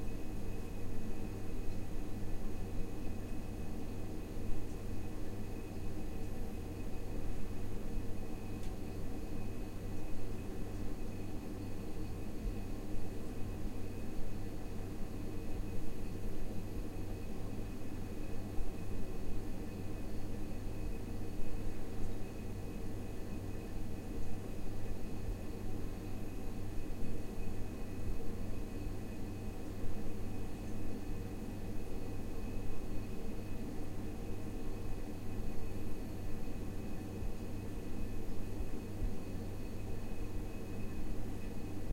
Tomt kök
The sound of an empty kitchen.
kitchen, empty